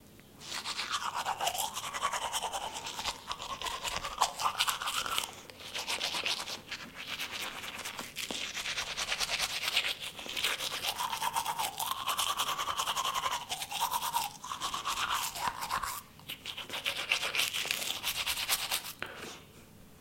teeth brusing
brush, film, OWI